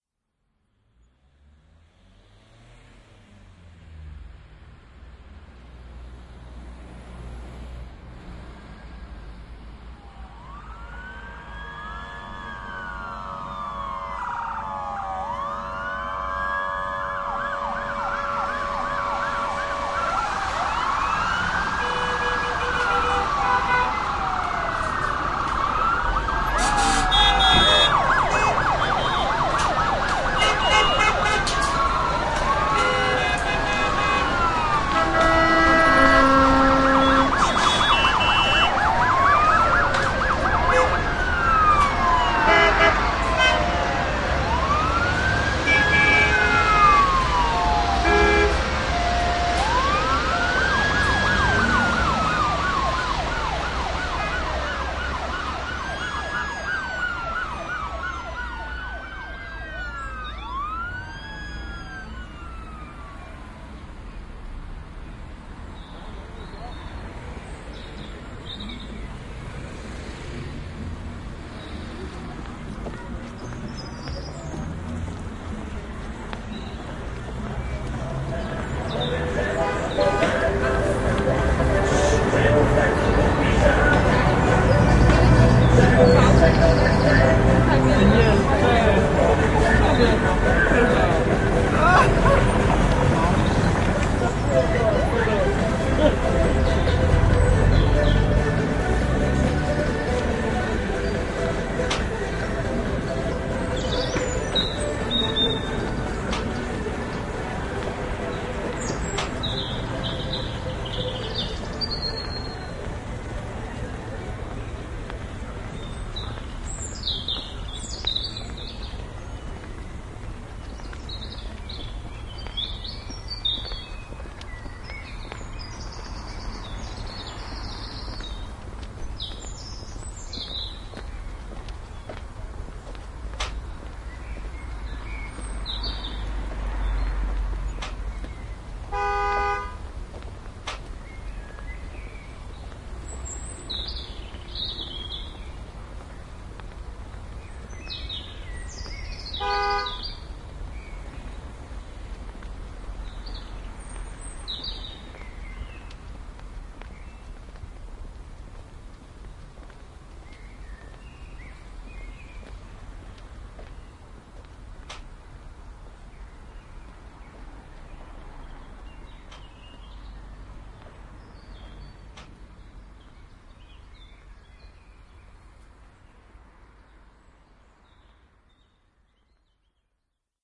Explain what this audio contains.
City Ambience
A pedestrian tries to get away of all the city noise. He walks until he finds a relatively "calm" place.
ambience, city, horn, park, pedestrians, siren